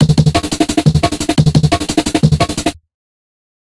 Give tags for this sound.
break
jungle
breakbeat
beat
drums

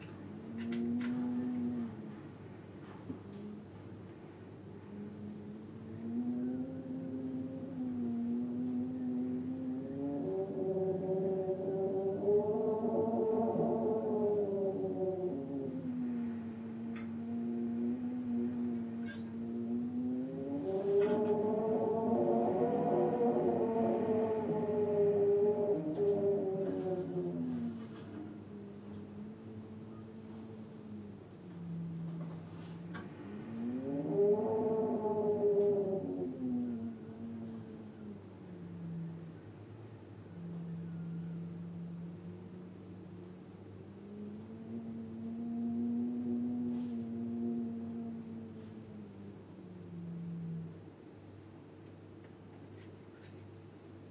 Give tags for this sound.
horror
night
recording
spooky
tube
wind

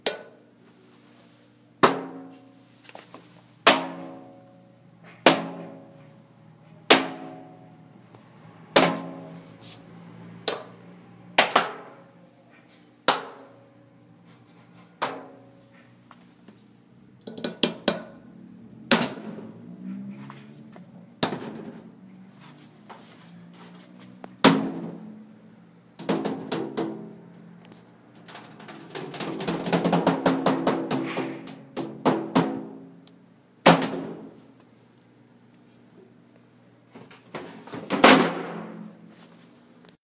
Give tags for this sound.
hit
trash
trash-can
foley
tap
metal

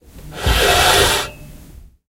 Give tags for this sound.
chair
marble
Mooved

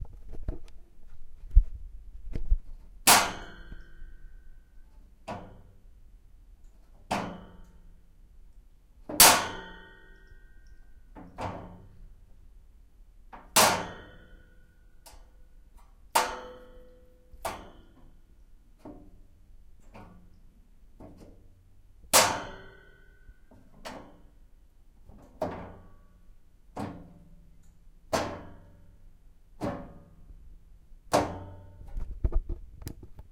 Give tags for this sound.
bang
boing
clang
cling
h2
hit
hit-sound
metal
radiator
zoom
zoom-h2

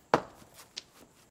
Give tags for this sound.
Rock
Thrown-Rock
Hit

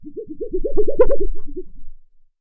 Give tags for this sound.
percs
SFX
board
pad
effect
hit

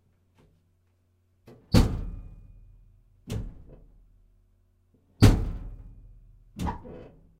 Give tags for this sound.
beat
bong
door
dryer
machine
slam
steel
thump
washer
washing

